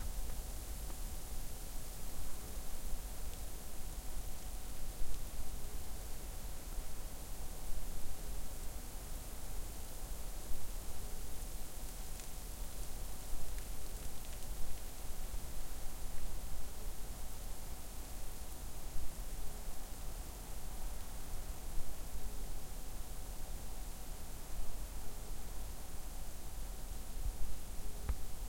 Snö som faller
Falling snow. Recorded with Zoom H4.
falling, snow